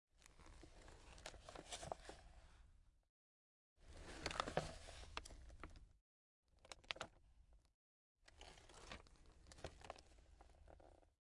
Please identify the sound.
Paper Handling
Foley, hand, paper